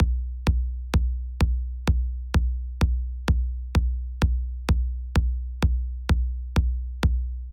Deep Kick
A deep sub kick I made using Sylenth and a top kick from one of my personally made libraries. Light compression, equing, and some very slight limiting with plenty of headroom.
Deep, Trance, EDM, Sub, Electro, Trap, Main, Kick, House, Trip, Room, Progressive